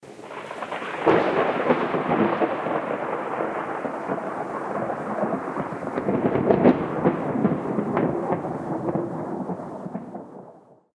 Far distant thunder from a lightning strike, this was edited in audacity